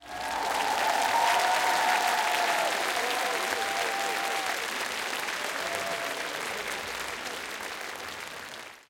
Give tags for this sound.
applaud
applauding
applause
audience
auditorium
group
hand-clapping
Holophone
theatre

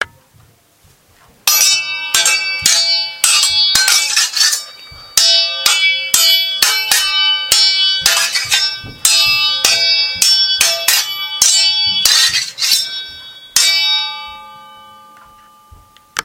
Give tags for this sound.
battle
slide
clash
sword
medieval
fight
weapon
duel

